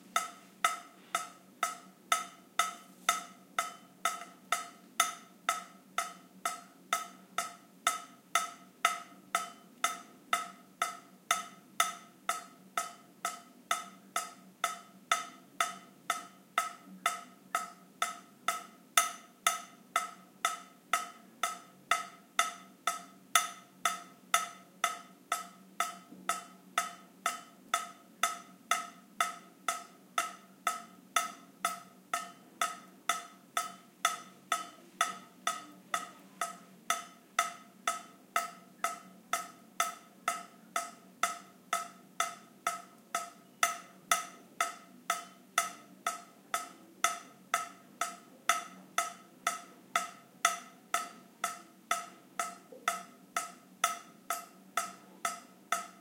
20110924 dripping.stereo.08
dripping sound. AT BP4025, Shure FP24 preamp, PCM M10 recorder